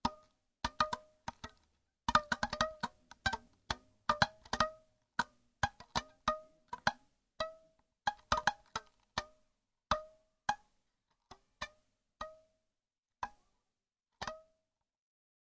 african sound 3
Some notes from an african instrument